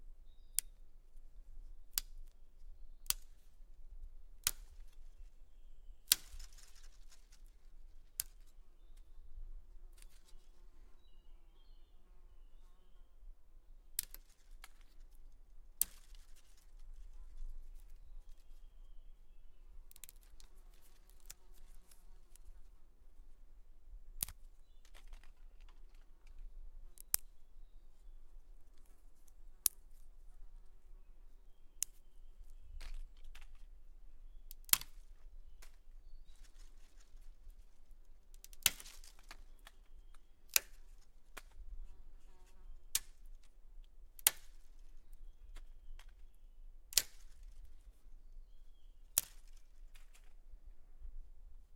SNAP SMALL BRANCHES AND STICKS
Snapping small branches and sticks. Tascam DR100 MkII. Sennheiser ME66.
forest, woods, branches, sticks, break, branch, stick, tree, snap